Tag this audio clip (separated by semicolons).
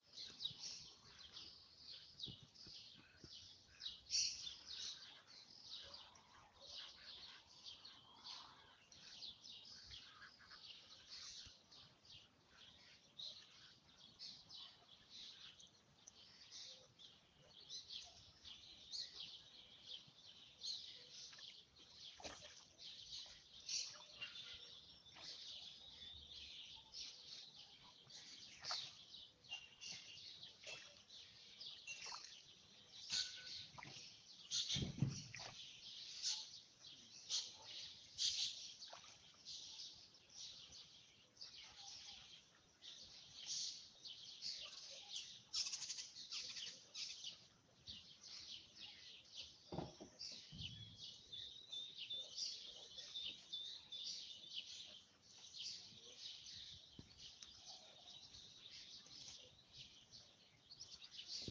soundscape; general-noise; ambient